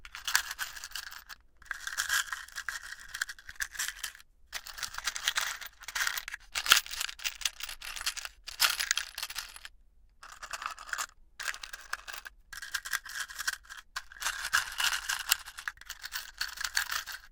Screw Box Shaking
box, Screw, Screws, shaking